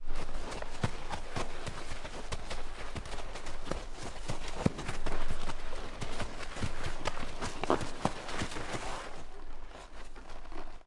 Horse Spinning In Dirt 07
I recorded a trainer spinning their horse in place on a dirt/sand track.